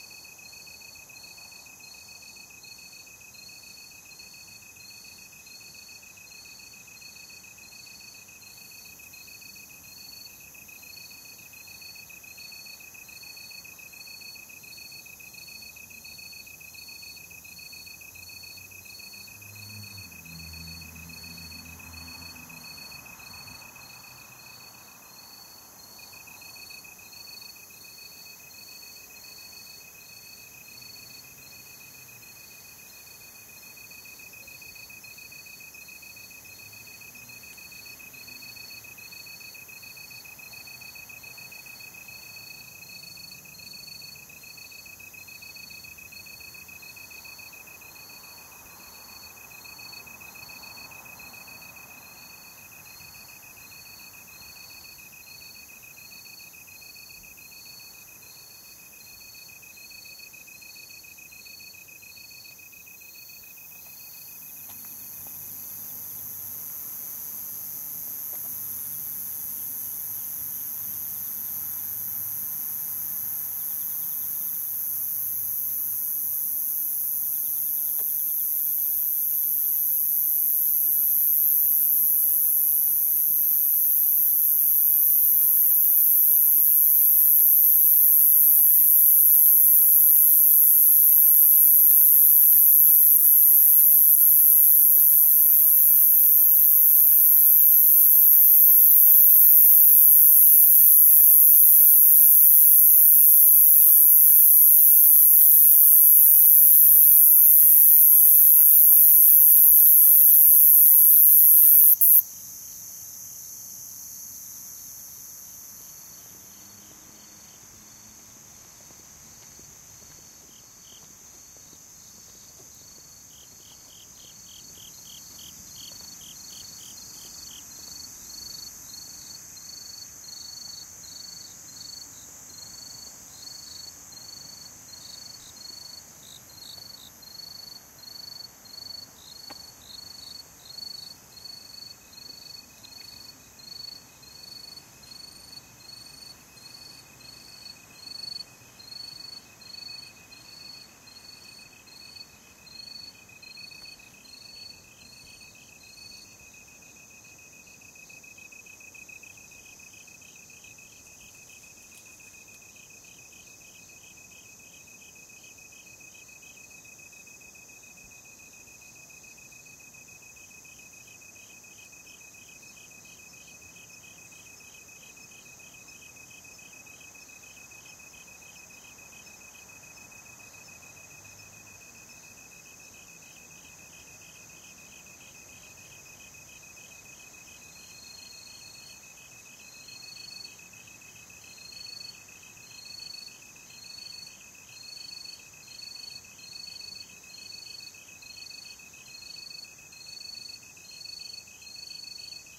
Japan Kashiwa Insects Far and Upclose
In Kashiwa, Chiba, Japan. East of Tokyo. Late October 2016. I noticed some cricket-like sounds. I walked around a field a small forrest to capture several of the insect sounds. At 2/3rd of the recording I got really close to a really intense and high-pitched one.
Recorded with Zoom H2n in MS-stereo.
ambiance, ambience, animals, atmosphere, autumn, chirping, cicada, cicadas, cricket, crickets, dark, evening, fall, fauna, field-recording, high-pitched, insect, insects, Japan, Japanese, Kashiwa, nature, night, village, ville, walk, walking